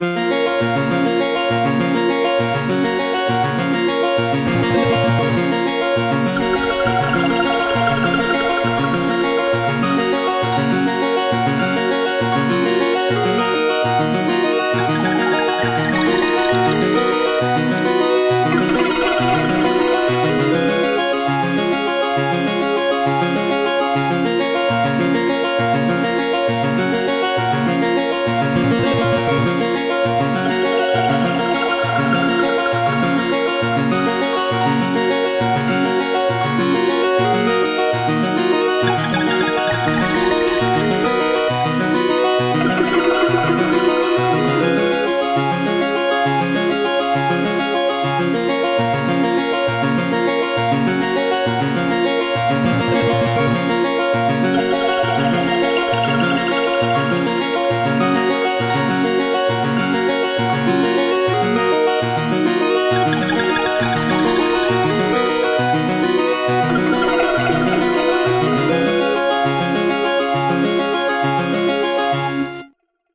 Lost Moon's -=- Mind X
some past thoughts I think you should here... cool ambient dramatic*
laboratory-toy-toons, 8bit